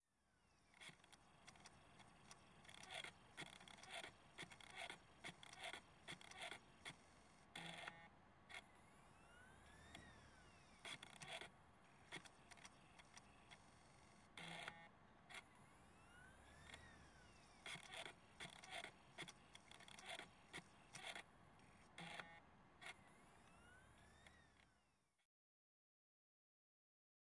33 hn G4CD
Broken CD drive on a Powerbook G4 spinning.
broken, cd, computer, g4, motor